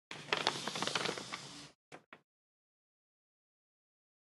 10. abriendo pergamino
foley papel abriendo
page, paper, newspaper, reading